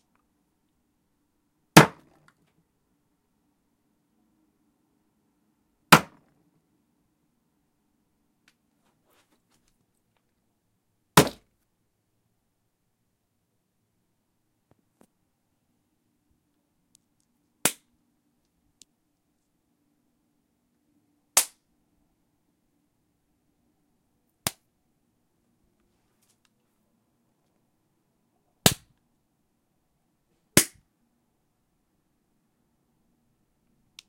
SLAP WOOD SMACK BODY
DESK, WOOD, SMACK